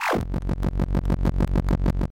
An 8 bit sound for a lifebar (or any other bar) fillage action
chiptune, 8bit, fill, arcade, lifebar, wave, retro, 8, 8-bit, chip, bit